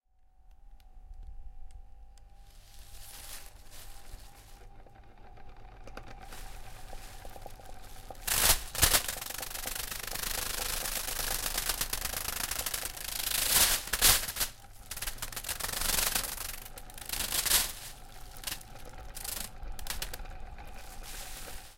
Put a cellopane bag on fans blades and listen..